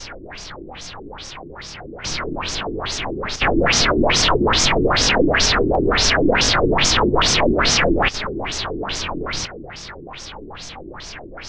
CARDOT Charlotte 2018 son2
The second synthesis sound reminder an alarm that sounded louder and louder. I generate this sound thanks to the invert and reverb effect.